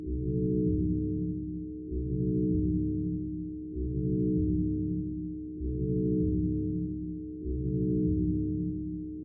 04 Loop Elc
dramatic, dynamic, pathos, improvised, quantized, filter, sad, soundtrack, trailer, effect, reverb, cinematic, fx, sountracks, slow, new, movie